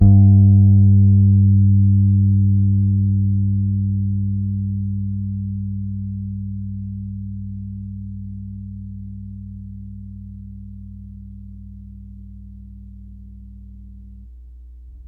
This is an old Fender P-Bass, with old strings, played through a Fender '65 Sidekick amp. The signal was taken from the amp's line-out into the Zoom H4. Samples were trimmed with Spark XL. Each filename includes the proper root note for the sample so that you can use these sounds easily in your favorite sample player.
bass, fender, multisample, string